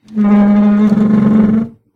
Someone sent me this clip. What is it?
Chair-Stool-Wooden-Dragged-10
The sound of a wooden stool being dragged on a kitchen floor. It may make a good base or sweetener for a monster roar as it has almost a Chewbacca-like sound.
Tile, Pulled, Push, Dragged, Wooden, Pushed, Stool, Drag, Ceramic, Kitchen, Wood, Roar, Monster, Snarl, Pull